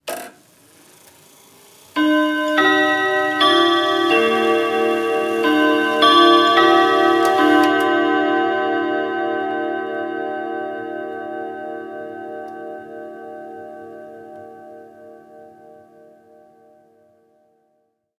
2 gustav becker westminster half
1920s Gustav Becker wall clock chiming the half hour.
Recorded with Rode NT2A microphone.